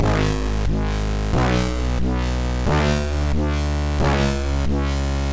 180 Krunchy dub Synths 01
bertilled massive synths